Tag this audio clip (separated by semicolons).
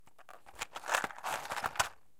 burning; fire; flame; match; matchbox; Rode; strike